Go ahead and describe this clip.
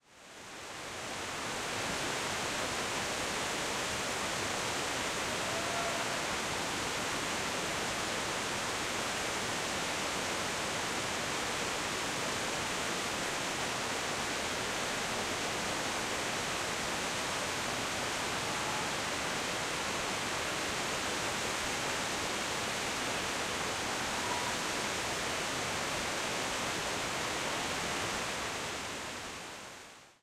Distant Waterfall 3
Field recording of a waterfall in the distance with quiet people in the background.
Recorded at Springbrook National Park, Queensland using the Zoom H6 Mid-side module.